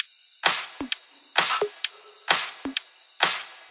Perc Loop 130 BPM EDM
EDM, House, Loop, 130, Dance, Trance